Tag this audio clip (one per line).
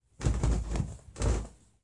study
recording